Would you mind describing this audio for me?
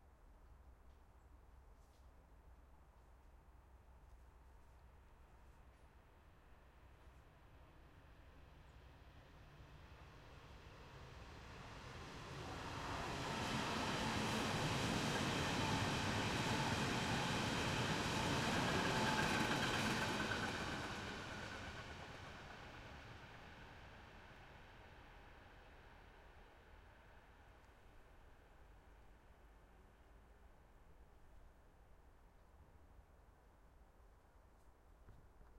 S-Bahn City Train Passing Close 6
S-Bahn city train passing. As heared on the bridge above the railway tracks. Recorded in 90° XY with a Zoom HD2 at Priesterweg, Berlin, in September 2016
city-train, s-bahn